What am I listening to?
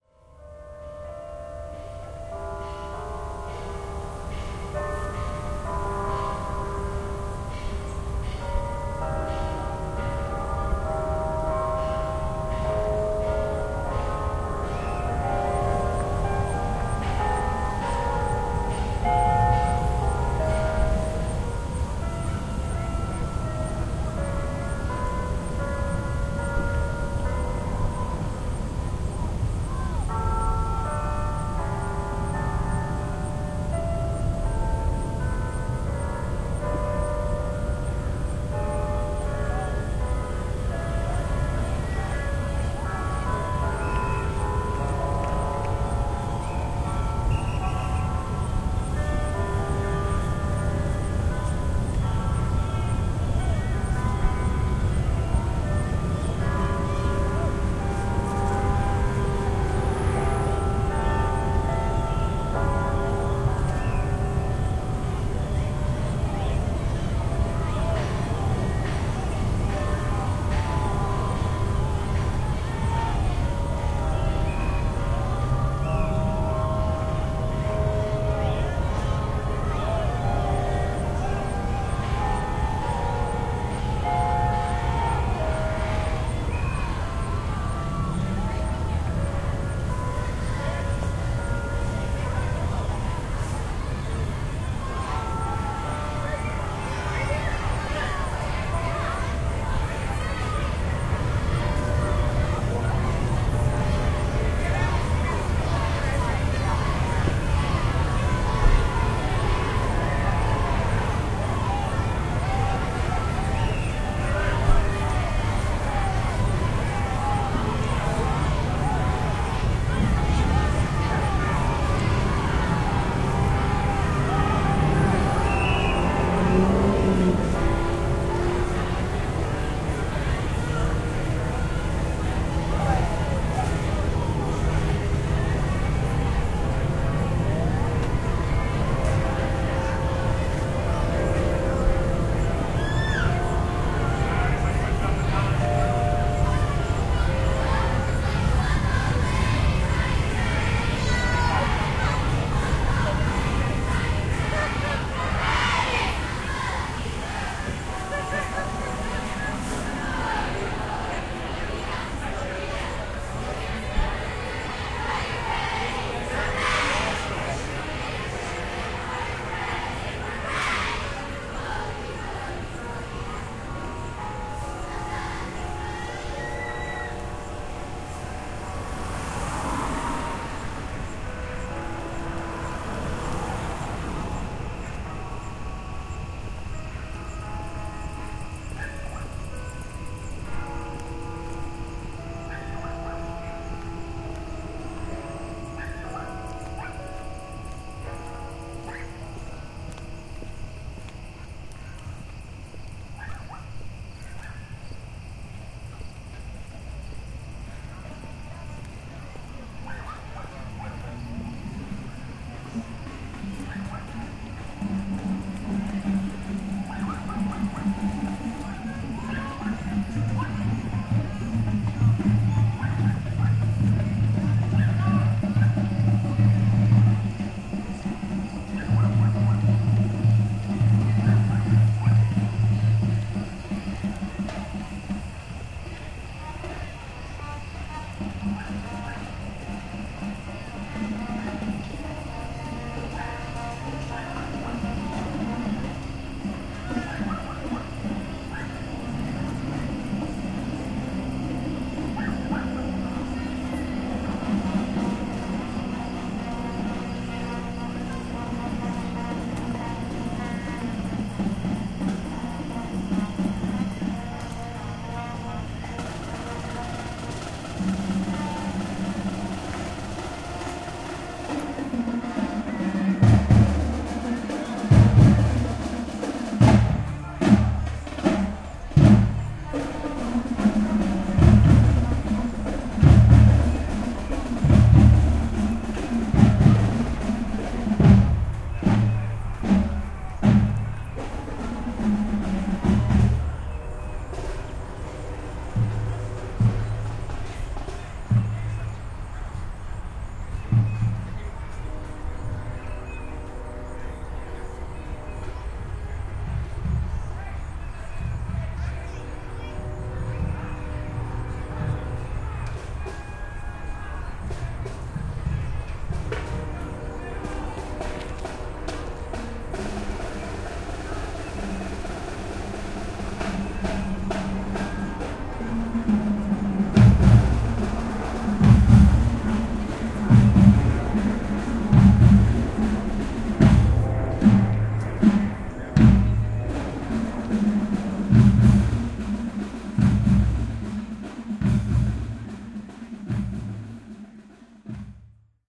"Peach Festival.." is composed entirely of field recordings I took while finishing up my debut album near the end of 2012. At the time I was mainly staying with my parents in Romeo, MI, a very small rural town that was celebrating its annual "Peach Festival" at the time. There were a wealth of sounds to capture in this environment, and the best few made it into this soundscape:
-a peaceful church bell melody from about 1/2-1 block away
-a middle school football team cheering back-and-forth with their cheerleader counterparts (this section taped during the rehearsal for a parade)
-crickets, birds, dogs barking, foot steps on sidewalk, and other small-town ambiance (someone hammering away at something?)
-a pee wee football practice from about 100 yds (coaches whistles, kids yelling)
-cars passing
-a marching band warming up
Stefanski, general-noise, ambient, soundscape, ambiance, field-recording, nature, ambience, R, bells, found-sounds, kids, background-sound, J, atmosphere